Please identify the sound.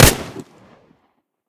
SMG Firing 02
SMG Firing
Created and Mixed in Mixcraft 7 PRO STUDIO
weapon, shot, guns, gun, shooting